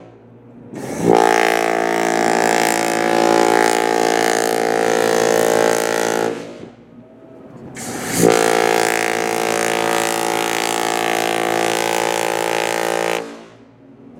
queen-mary-2 NY-3
The ship-horn of the Queen-Mary-2 recorded on the deck while leaving New-York harbour.
Recorded with Canon G10.
field-recording, harbour, horn, new-york, queen, queen-mary-2, ship, shiphorn